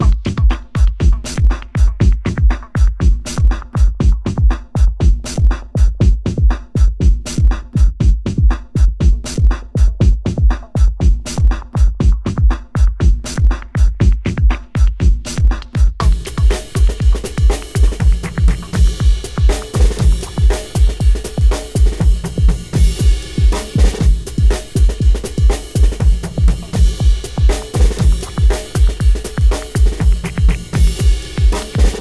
beat tricombo 3
groove drums. 2 styles in 2 times. Groove, groove, groove! Logic